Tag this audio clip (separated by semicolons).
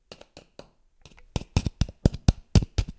Essen; Germany; January2013; SonicSnaps